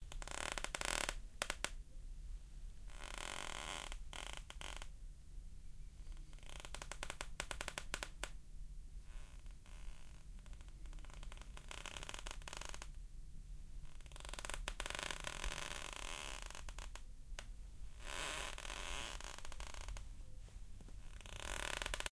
Creaking Wooden Floor
This is the sound of my creaking wooden bedroom floor.
Useful for ships or ghostly horror effects.
Equipment that is used: Zoom H5 recorder + Audio-Technica BP4025 Microfoon.
creak, creaking, creek, door, floor, ship, squeaky, wood, wooden